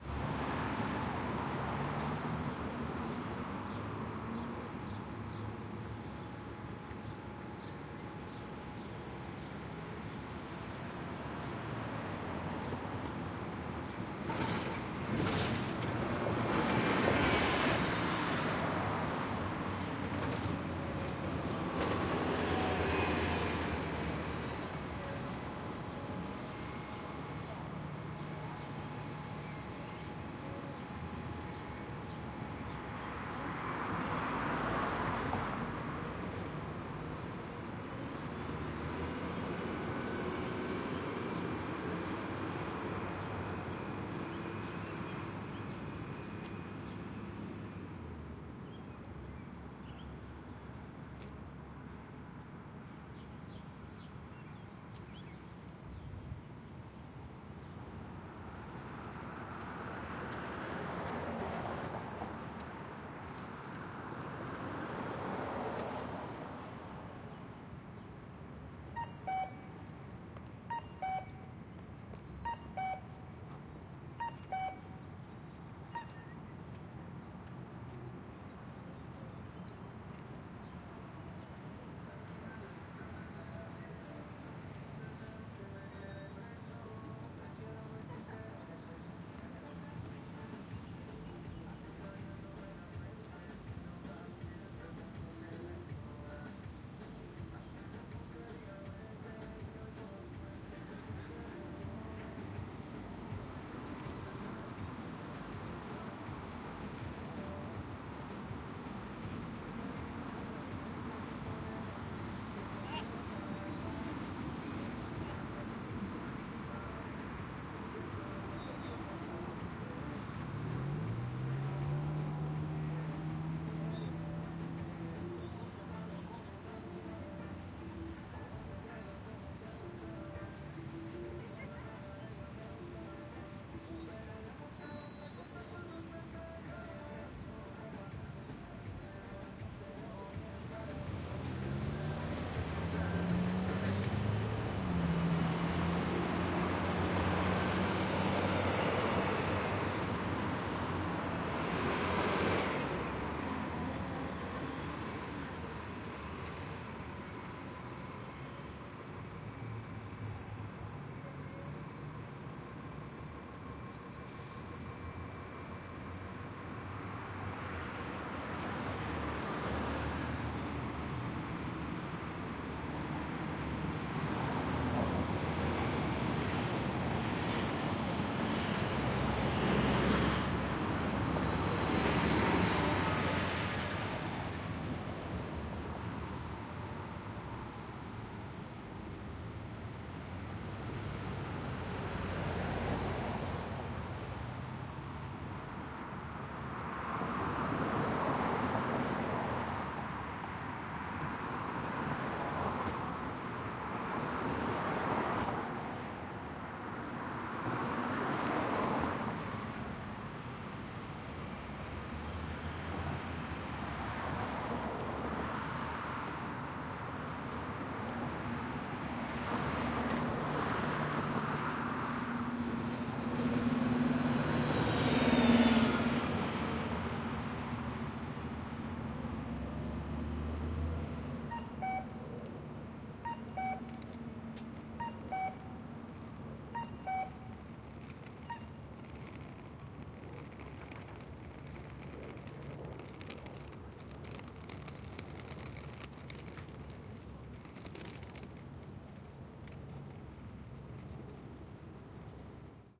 3- Forbes & Morewood Intersection - Trk-5 N.West
Field Recordings from May 24, 2019 on the campus of Carnegie Mellon University at the intersection of Forbes and Morewood Avenues. These recordings were made to capture the sounds of the intersection before the replacement of the crossing signal system, commonly known as the “beep-boop” by students.
Recorded on a Zoom H6 with Mid-Side Capsule, converted to Stereo
Editing/Processing Applied: High-Pass Filter at 80Hz, 24dB/oct filter
Recorded from the north-west corner of the intersection.
Stuff you'll hear:
Car bys (throughout, various speeds)
2 busses pass, faint stop announcement
Footsteps
Crossing signal (1:09)
Dog
Car with music
Quiet voices walla (laughter)
More busses
Crossing signal (3:51)
Bike shifter
Person rolling suitcase